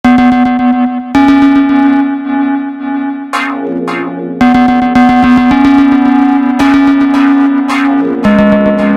Metro Tunnel
Me Traveling Through A Tokyo Underground.
abstract, techno, tunnet